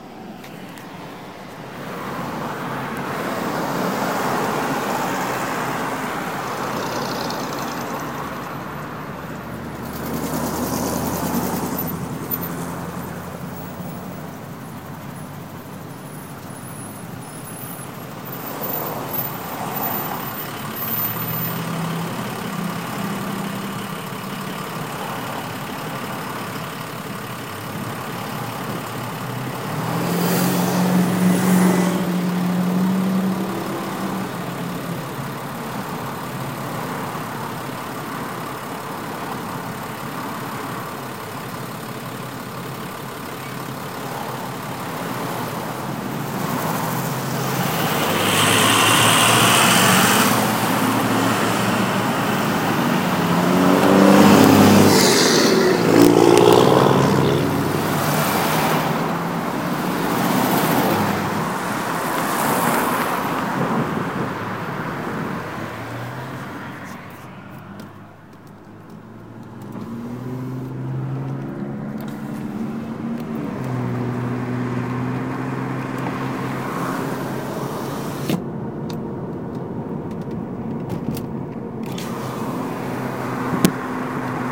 Parked at Stoplight of Busy Intersection
Sitting at a busy intersection at 3:00 in the afternoon with my window rolled down.
busy, cars, down, drive, driving, intersection, road, Stoplight, traffic, traffic-light, trucks, vehicles, window